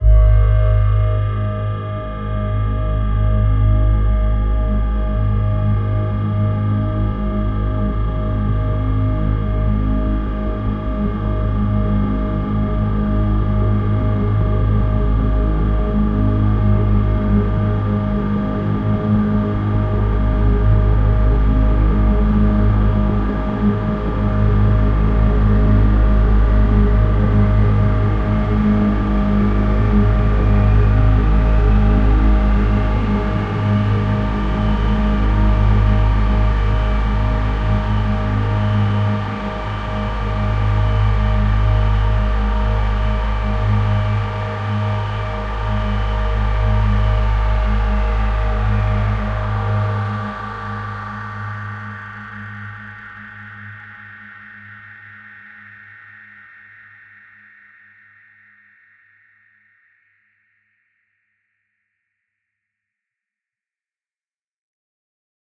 Space ambience: strange signal, deep atmosphere, rising pitch wail. Hard impact sounds, abstract soundscape. Recorded and mastered through audio software, no factory samples. Made as an experiment into sound design, here is the result. Recorded in Ireland.
Made by Michaelsoundfx. (MSFX)
alien, ambience, ambient, atmosphere, deep, drone, future, impact, sounds, soundscape, space